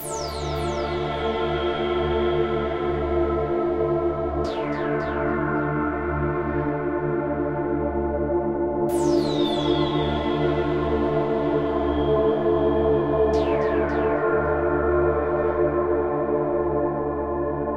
Starburst Synth Pad 01 - 108bpm - Dmin - New Nation
atmosphere, beat, chord, chord-progression, cinematic, cool, dope, fire, hip-hop, loop, pad, synth, trap, trip-hop, weird